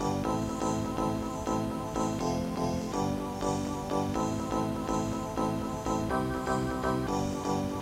Cass 011 A 4thMonth Loop02
While looking through my old tapes I found some music I made on my Amiga computer around 1998/99.
This tape is now 14 or 15 years old. Some of the music on it was made even earlier. All the music in this cassette was made by me using Amiga's Med or OctaMed programs.
Recording system: not sure. Most likely Grundig CC 430-2
Medium: Sony UX chorme cassette 90 min
Playing back system: LG LX-U561
digital recording: direct input from the stereo headphone port into a Zoom H1 recorder.
Amiga500, cassette, Loop, Sony